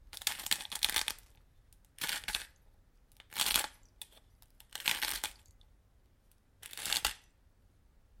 grinding salt from a salt grinder
grinding, kitchen, salt